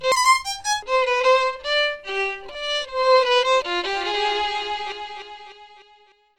LACOUR Lena LPCIM 2018 violon
For starters, I made this sound with a violin sound sample because I really like classical dance. I then separated my sound into 4 distinct parts. Thanks to the software I was able to change the tempo of my sound either by speeding up the pace or slowing it down. Finally I added the effects "echoes", "reverb", and I also changed the pitch of the notes.